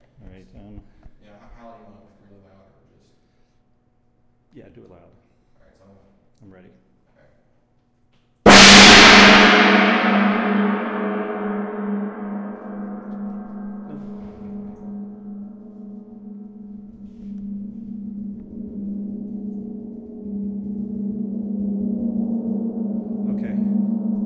live Orchestral gong
field-recording, gong, music, percussion